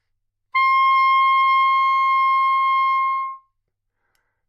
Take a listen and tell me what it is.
Sax Soprano - C6
Part of the Good-sounds dataset of monophonic instrumental sounds.
instrument::sax_soprano
note::C
octave::6
midi note::72
good-sounds-id::5600
good-sounds, C6, multisample, neumann-U87, single-note, soprano, sax